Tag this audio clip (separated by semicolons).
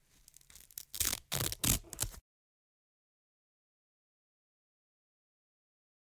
effect; foley; velcro